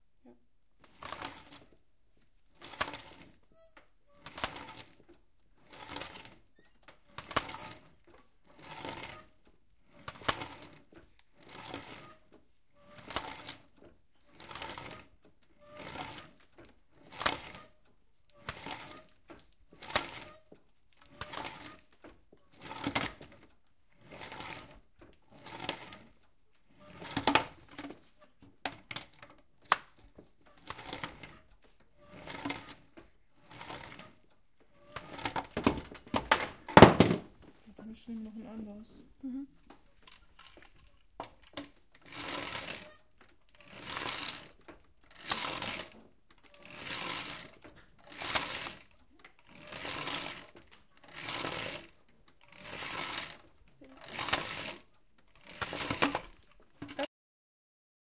navette de métier à tisser
Weaving-loom element, recorded with an ortf microphone and a DR-44WL recorder.
handcraft, craft, weaving, loom